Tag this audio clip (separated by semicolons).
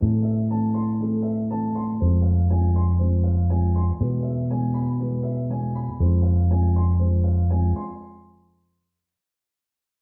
bass; bpm